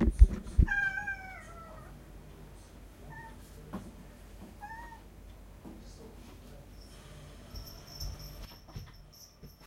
Cat caught a bird
My cat was meowing insistently. I started recording her with my Zoom H1 before I realized what was going on: she had caught a bird and was calling me to come and see the good job she had done.
You can probably hear the TV in the background. And I had to cut out parts of the recording because of other noises, so you get several small files, instead of a large one.
calling, cat, meow, miaou, miaow